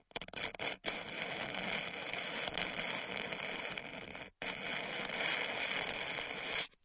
Queneau grat 10
Grattements, règle, piezo